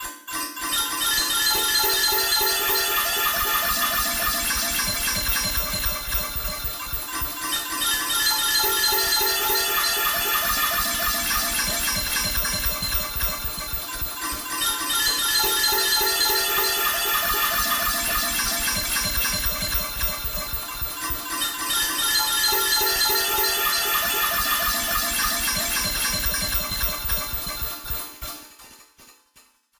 Created by Kimathi Moore for use in the Make Noise Morphagene.
“The sounds I've tried sound very good for the Morphagene i hope, and are very personal to me. That was bound to happen, they're now like new creatures to me, listening to them over and over again has made them very endearing to me. I also added my frame drums which I thought would be a good addition, sound tools, heater, Julie Gillum's woodstove, and a small minimalist piano composition.. In addition to the roster 2 of them are from Liz Lang, whom I wanted to include here as she was my sound/composition mentor.”